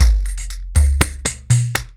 Loop created in Lunchbox, based on single pandeiro samples